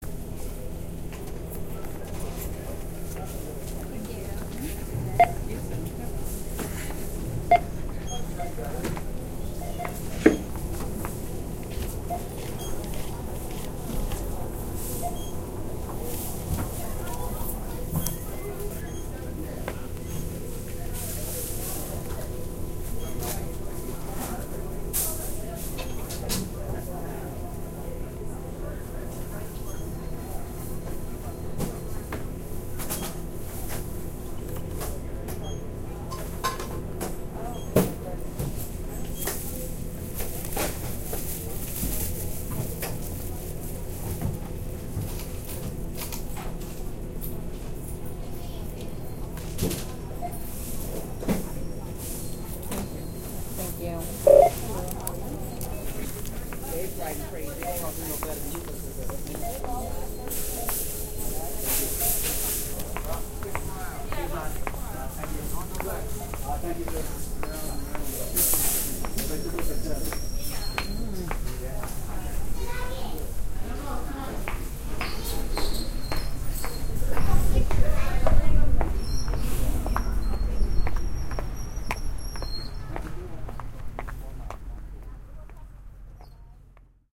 At a department store checkout lane. One can hear the sounds of the cash-registers ringing out various items. Recorded with r-05 built in microphones
ambiance, beep, beeping, cart, cash, cash-register, department-store, hum, mall, men, moving, register, shop, shopping-mall, store, walking, women